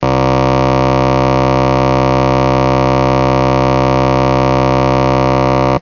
The sound of the Magical Musical Thing... Remember it?